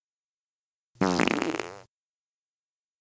medium-realpoot111
An unusual sounding poot with a squishy quality but not too wet sounding. Processed with Audacity for a more consistent tone and volume.
bodily-function, body-function, cute, fart, flatulate, flatulation, flatulence, gas, human, human-body, low-noise, pass-gas, poot, real-fart, real-poot, toot